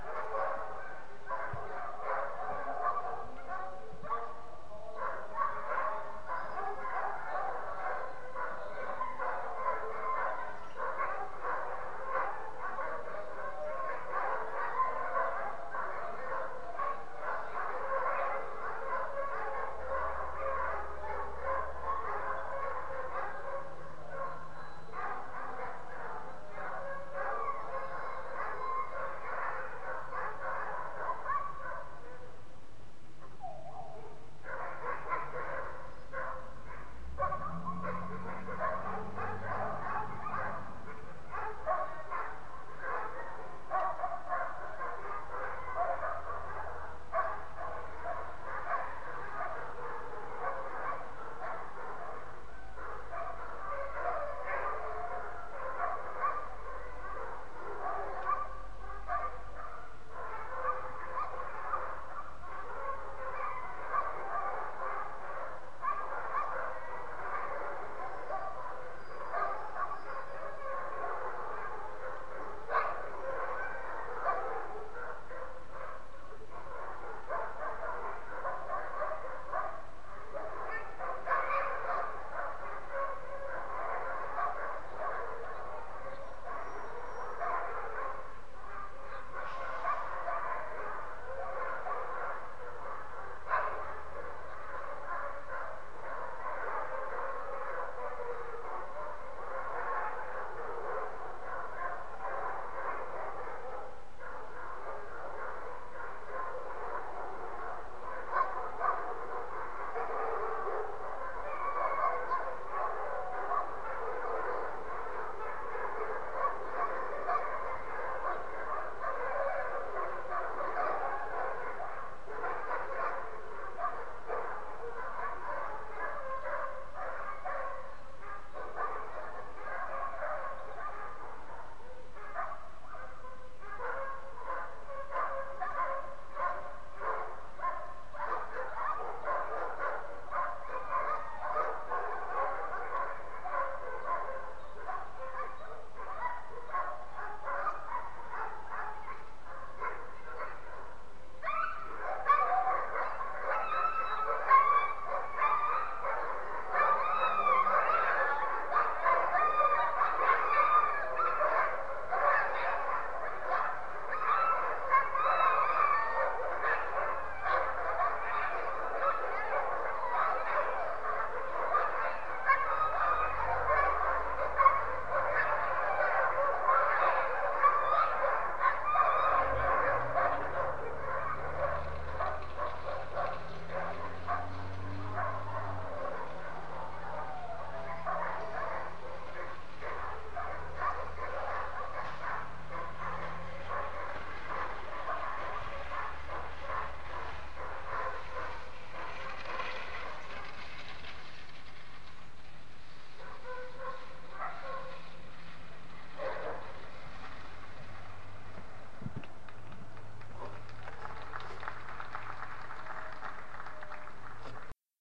Denali National Park dogsled demonstration from a distance. Dogs bark, whine, and howl because they want to pull the sled. Applause near the end of the recording.
alaska, barking, denali, dogs, dogsled, howling, kennel, whining